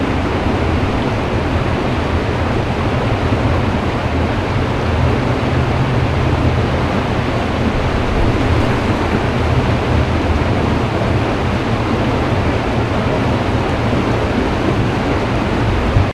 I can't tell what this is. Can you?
Large Waterfall 2 (Loopable)
A up-close sound of a large waterfall, intense flood, or torrent of rain during a storm. You could even use it for the sound of water rushing out of a dam. Altered from a recording of a flash flood.
current, river, water, flood, storm, nature, large, bass, ambience, rain, waterfall, dam, intense, flash, deluge, up-close, giant, ambient, torrent